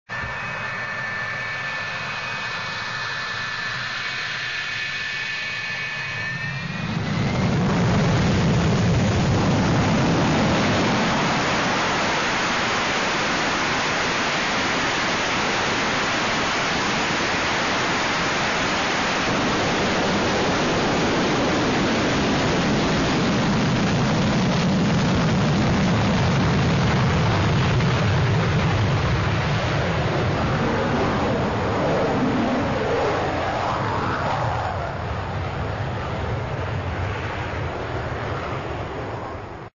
Afterburner sound
This is a USAF F-4 Phantom fighter from taxi, to engine run-up and then full afterburner takeoff.
afterburner, aircraft, engine, f-4, f4, fighter, jet, phantom, takeoff